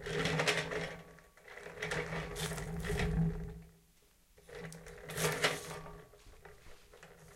Rolling a large object with wheels